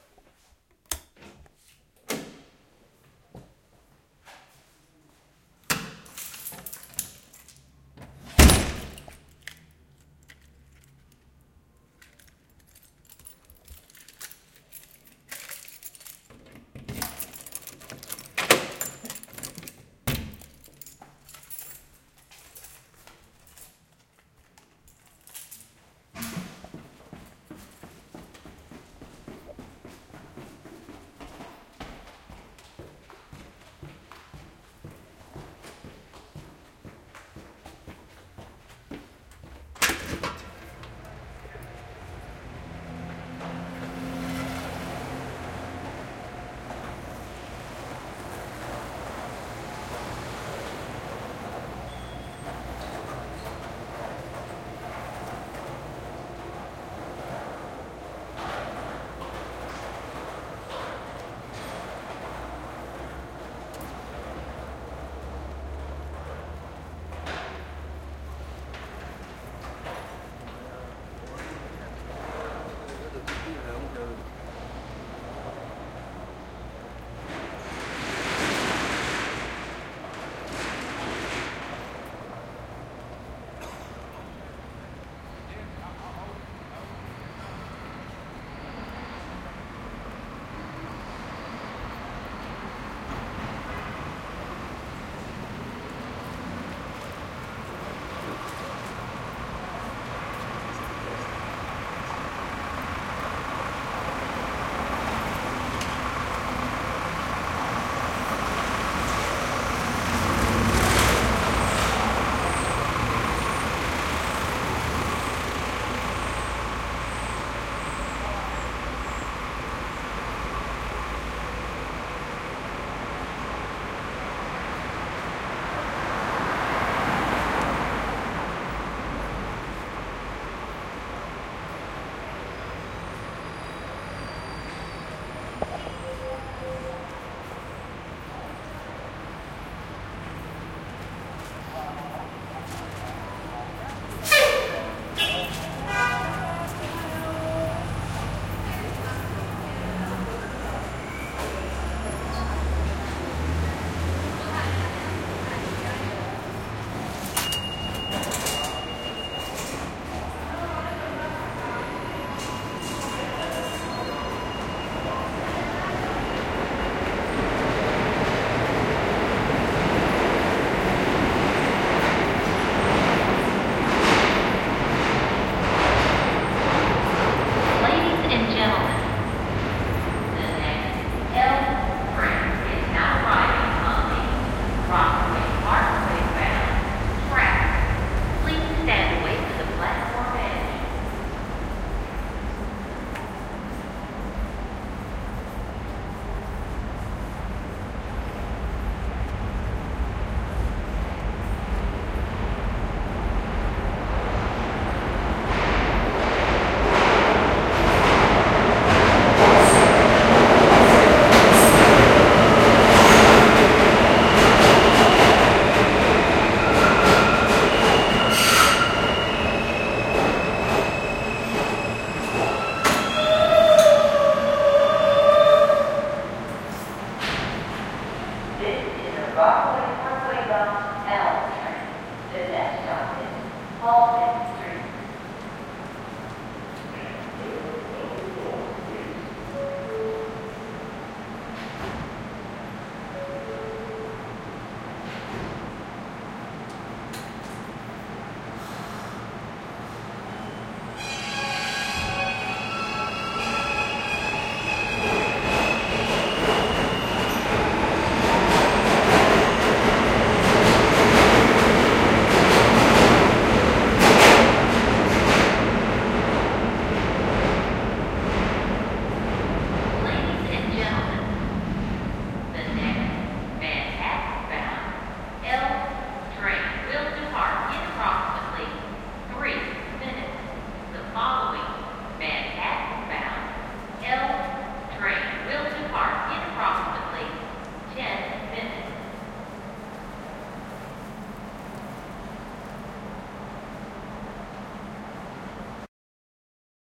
Leaving apartment + Bushwick Street + Subway
thisis the first half of my journey to work, including the sound of me leaving my apartment, walking to the subway, and waiting for the train.
apartment, brooklyn, bushwick, door, keys, lock, l-train, stairs, street, subway